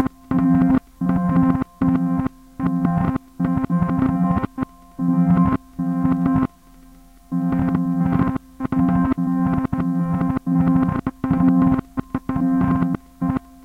Rhodes piano and granular synthesis set to "freeze" mode.